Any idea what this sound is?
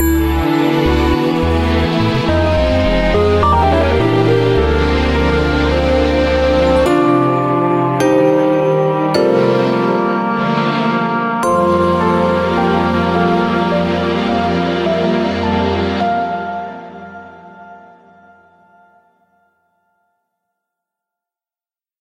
mono copy crescendo song195-2
Original stereo wave file processed in audacity.
classical, melody, orchestra, piano, symphony